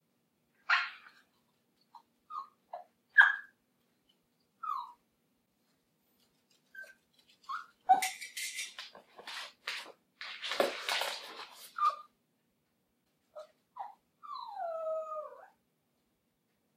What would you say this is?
Chihuahua Whines
Here are some recordings of my chihuahua puppy whining.